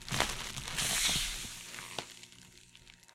bag air release
failed pop from an air filled bag
air, bag, release, woosh